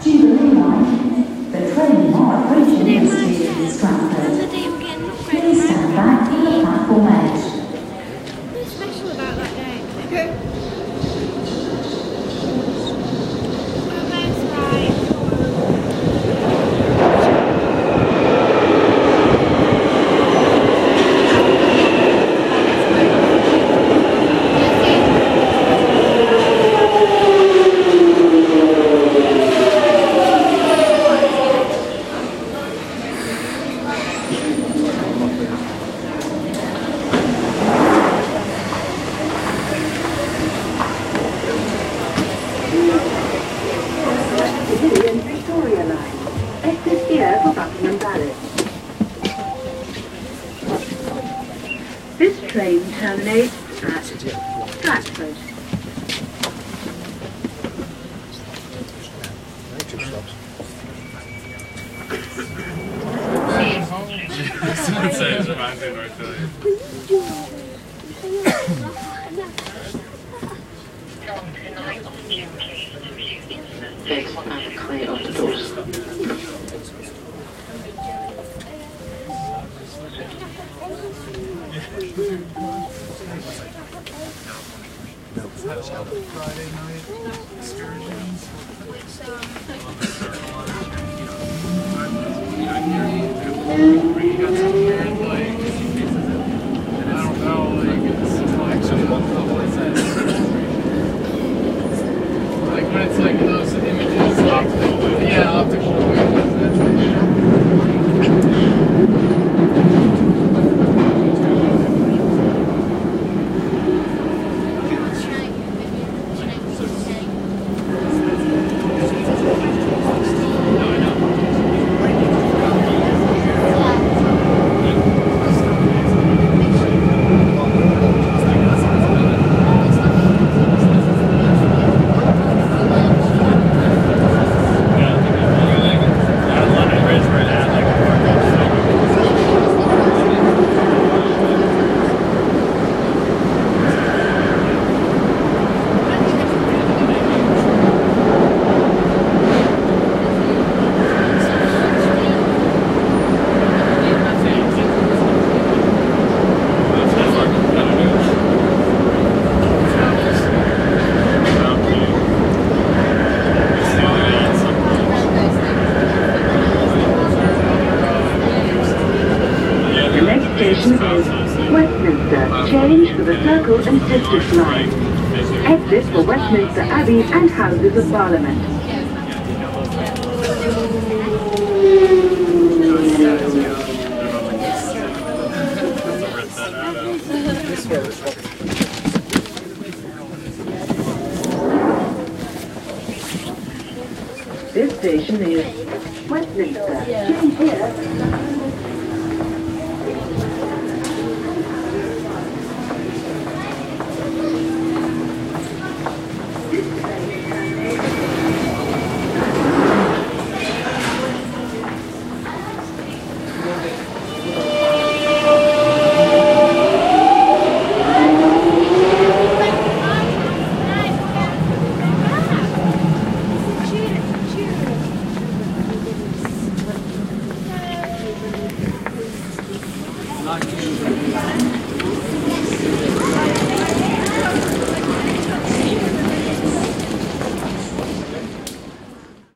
London Underground- one-stop journey and station ambience
Travelling on the London Underground between Green Park and Westminster stations. There is also a little bit of station ambience too. Train arrives and leaves station, doors open and close, 'stand clear' announcement, and a two-way radio of a police officer beeping and transmitting messages. Recorded 17th Feb 2015 with 4th-gen iPod touch. Edited with Audacity.
ambiance, ambience, announcement, arrive, beep, beeps, close, depart, doors, field-recording, green-park, jubilee-line, london, london-underground, metro, open, people, police, station, subway, talk, train, tube, tube-station, tube-train, two-way-radio, underground, voice, walkie-talkie, westminster